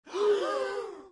breath group shocked7
a group of people breathing in rapidly, shock-reaction
air, shock, breath